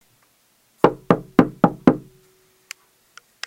Someone knocking on a wooden door. Five sharp knocks are heard. Not too fast not to slow. A great audio clip.